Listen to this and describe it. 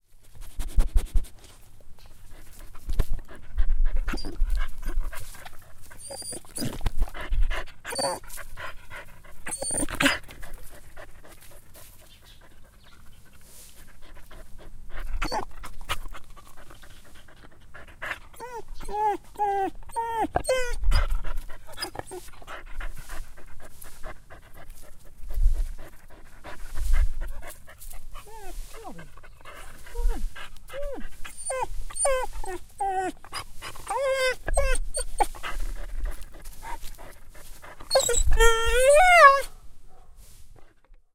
Dog whining
Miked from 6-18" distance.
Outdoor setting, following dog's snout as closely as possible with mic.
whining, field-recording, outdoors, Animal, dog